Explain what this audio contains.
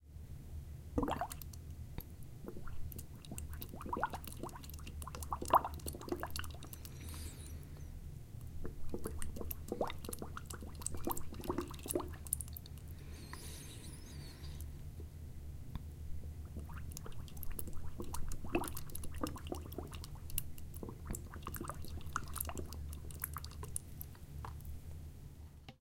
Slow Bubbles
Elaine, Field-Recording, Koontz, Park, Point, University